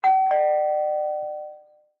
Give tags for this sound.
puerta
campana
timbre

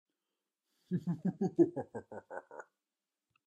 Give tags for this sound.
Laugh
Scary
Deep
Voices
Villain
Evil
Mean
Spooky

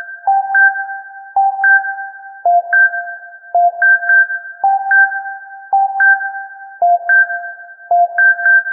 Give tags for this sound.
synth
110bpm

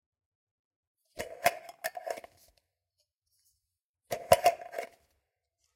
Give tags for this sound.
cap
jar
open
squeak

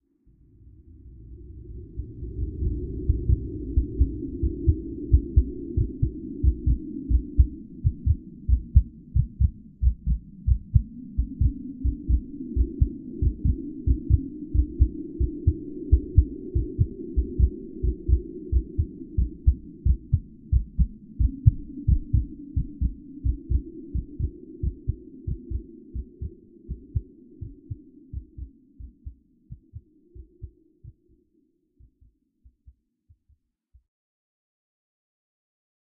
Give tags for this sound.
intro
hearbeat

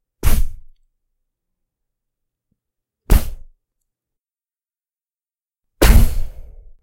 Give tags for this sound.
magic
poof

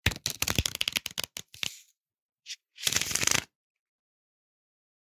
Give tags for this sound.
card
cards
deck
shuffle

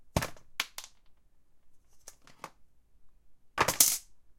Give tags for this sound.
bucket
zinc-bucket
clatter
rattle

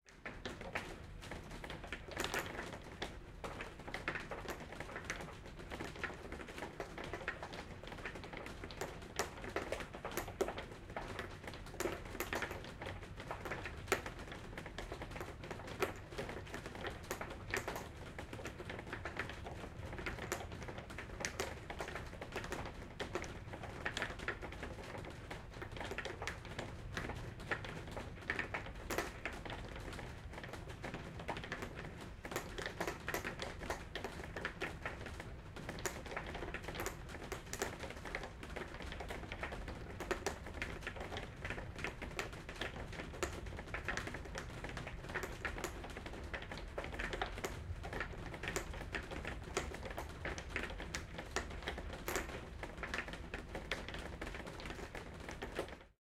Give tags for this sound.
nature
rain
storm
thunder
weather

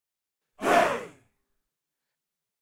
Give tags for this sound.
field-recording
grito
scream

